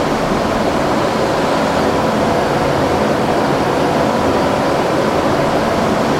gen loop
engine, generator, running